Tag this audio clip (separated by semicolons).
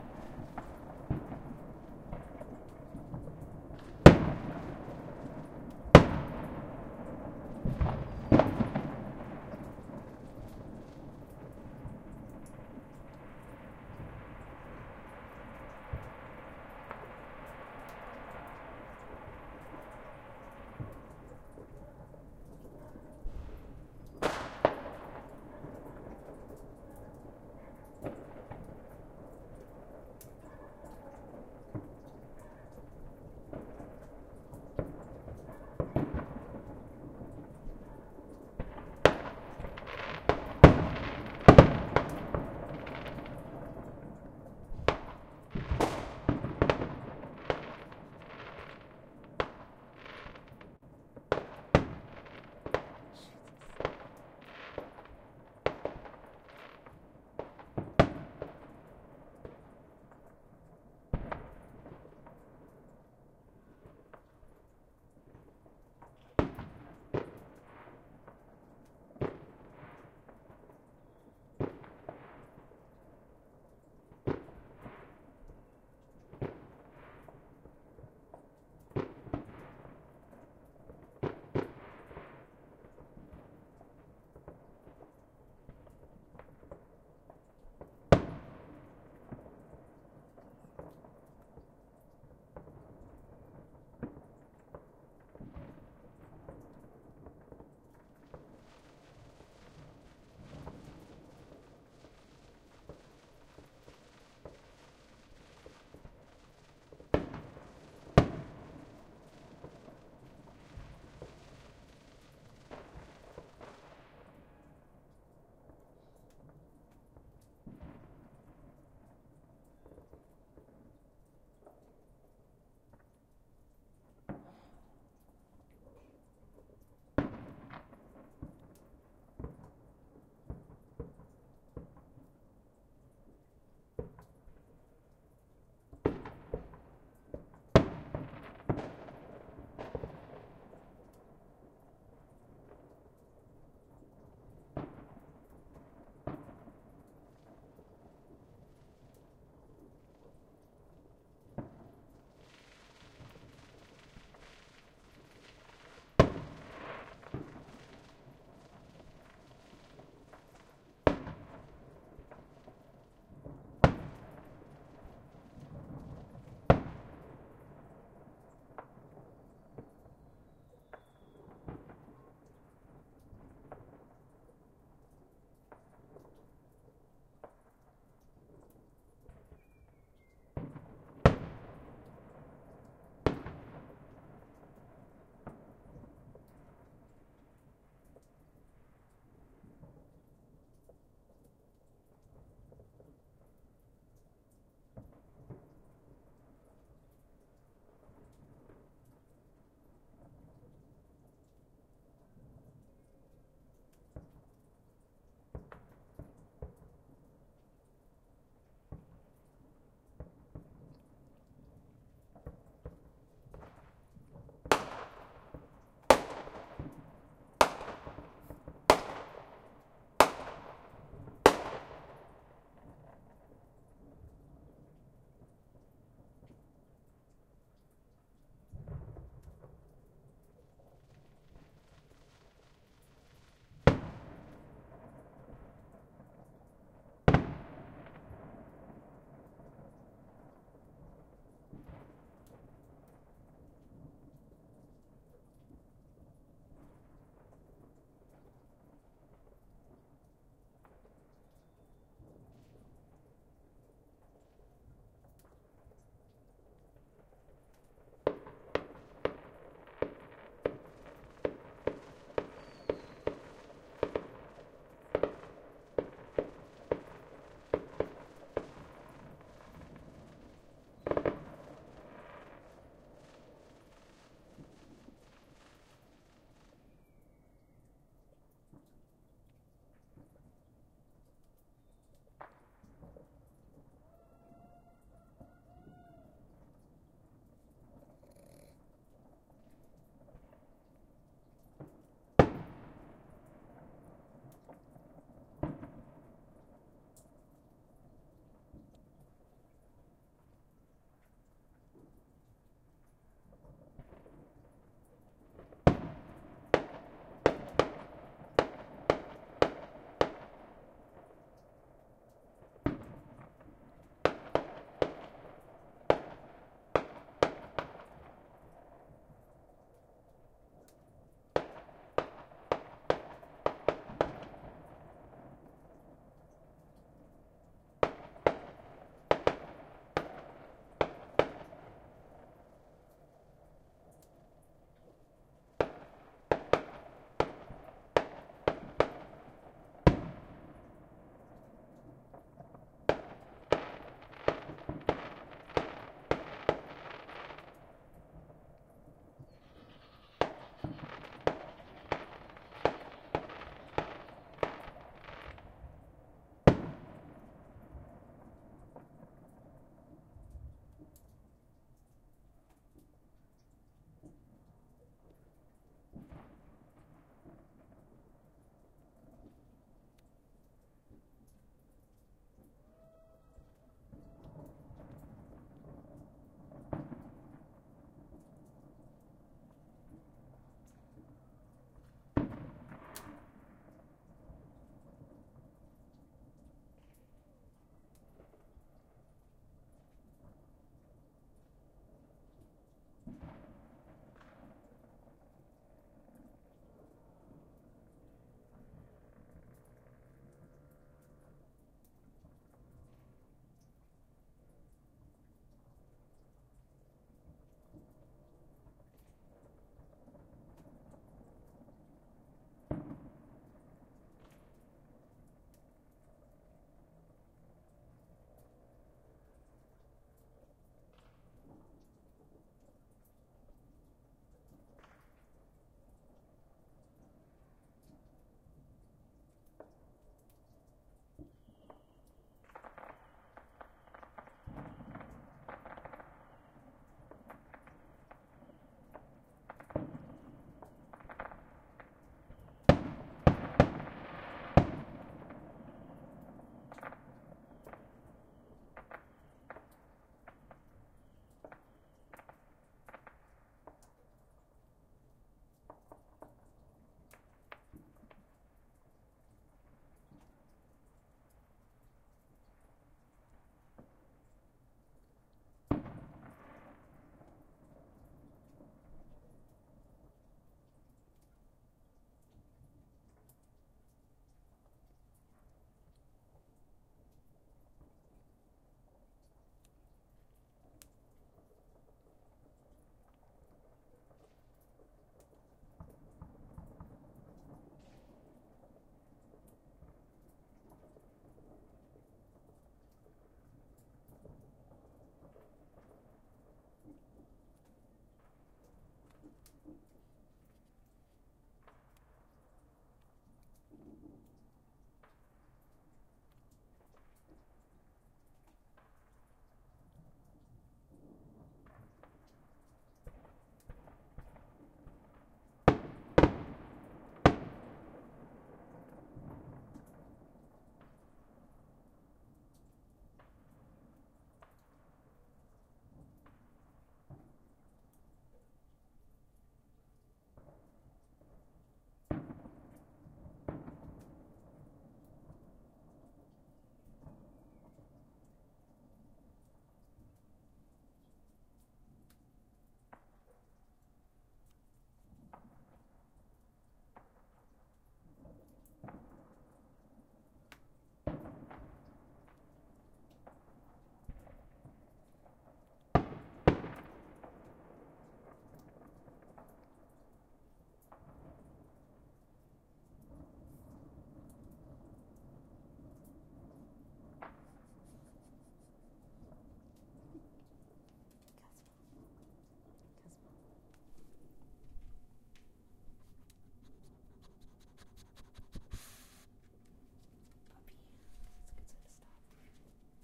ambiance; ambience; battle; bomb; boom; explosion; explosions; field-recording; fire-crackers; firecrackers; fire-works; fireworks; neighborhood; new-years-eve; suburban; suburbs; war